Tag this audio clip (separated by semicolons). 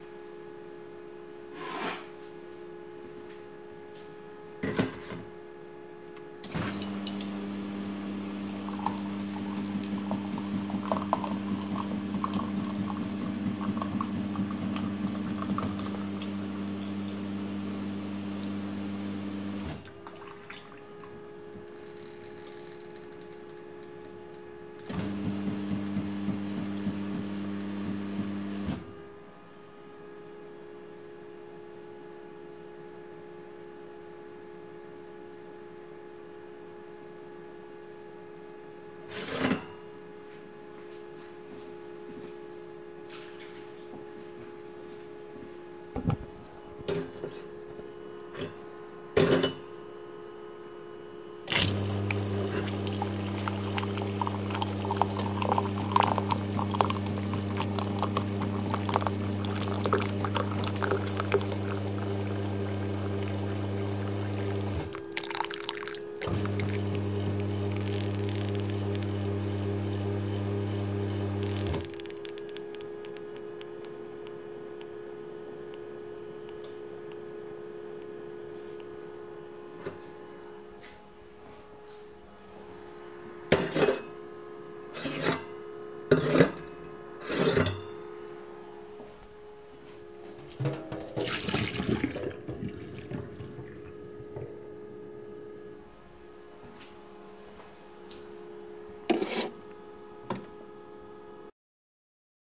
rattle; Coffe-Machine; Cup; electronic